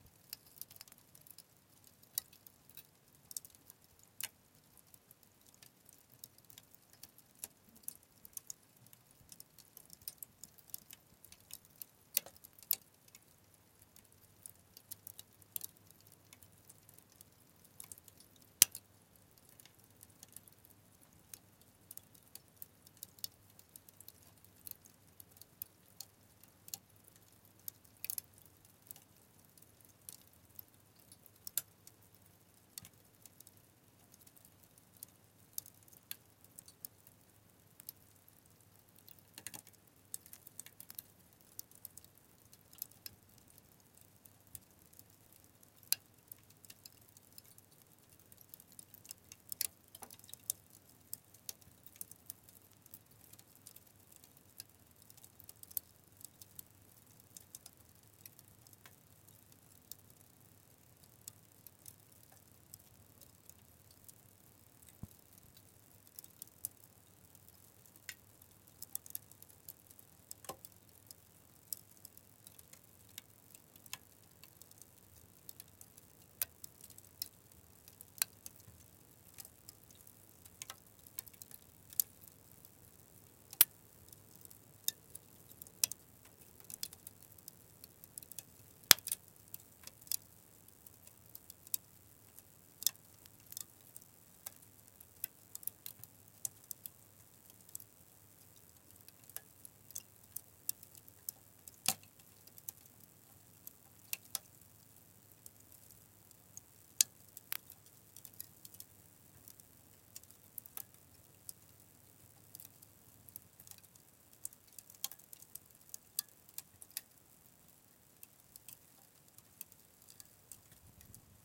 Sound of wood charcoal slow burning. very clean recording.